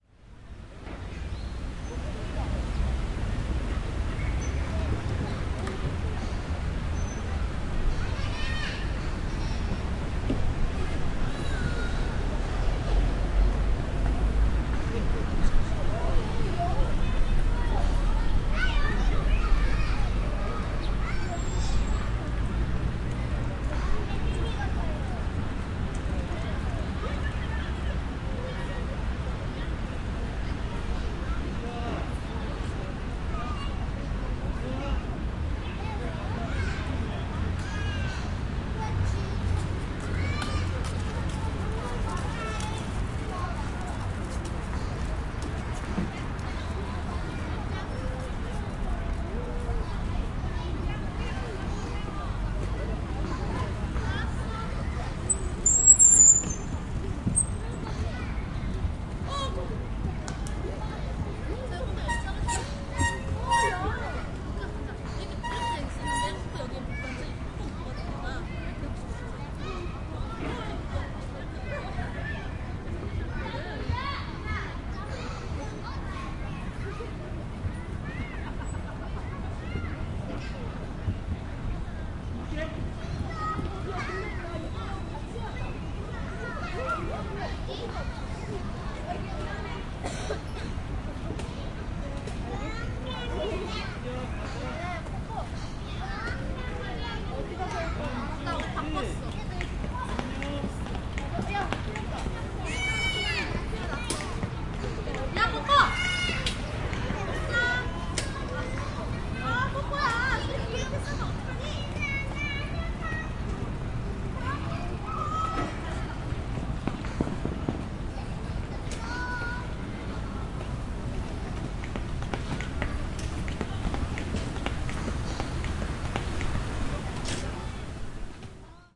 0294 Mullae park 2
Kids, children playing, shouting. People walking. Bicycle brakes. Golf.
20120616
bicycle, brakes, cars, field-recording, footsteps, korean